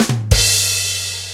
The shortened remix of Simon_Lacelle's sound